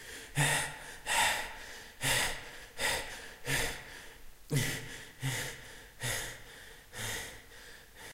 Just a sample I made of a male out of breath
voice, loud-breathing, Breathing